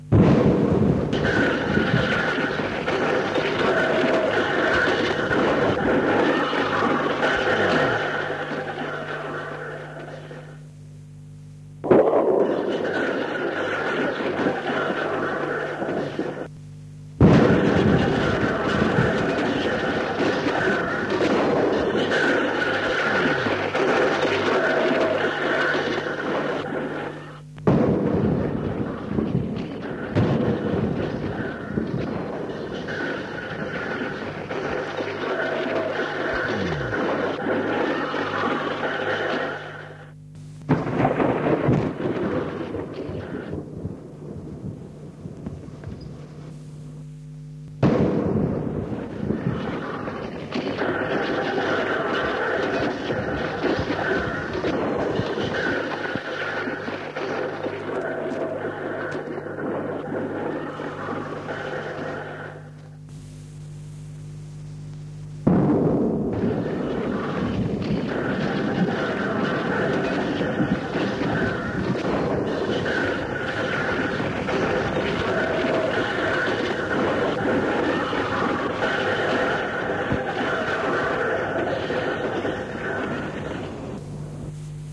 Done in year 1985 and transfedrred from c-cassette. Slightly edited with audacity.